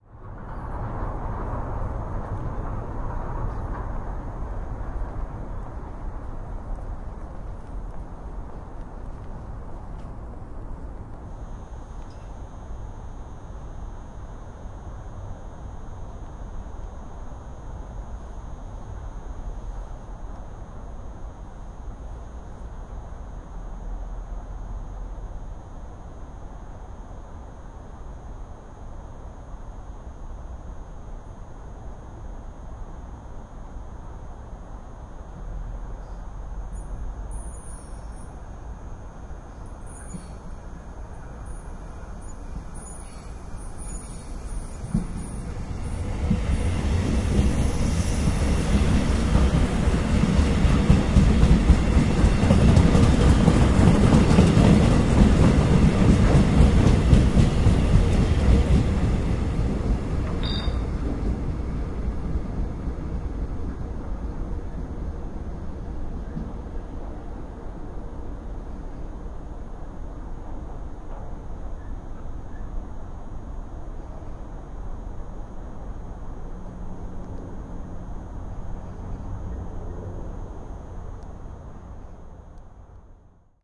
Traffic background. Metro straight pass. Beep. From outside.
20120116